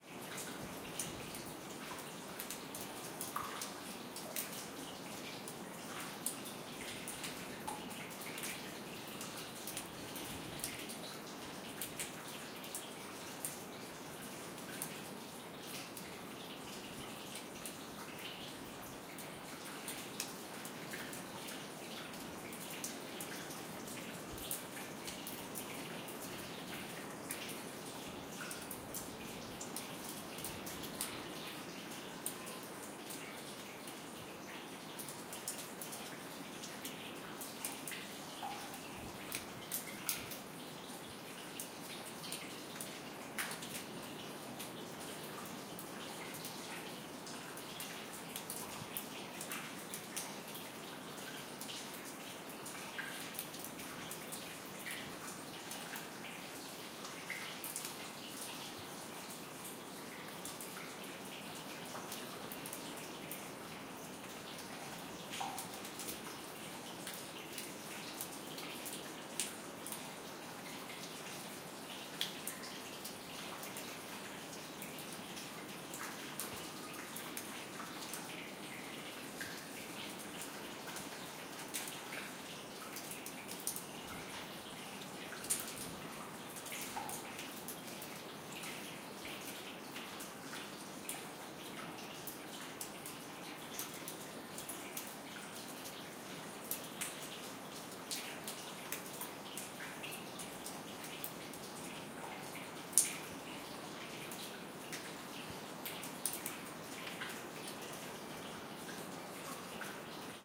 I've been to some old massive limekilns and it's like a cave inside with lots of water dripping from the ceiling. There was also a draft coming through the whole building. And there's a leak in the wall. That's the water you hear streaming in the background.